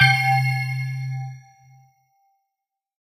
Made up by layering 3 additive synthesized spectrum sounds ran them through several stages of different audio DSP configurations. FL Studio 20.8 used in the process.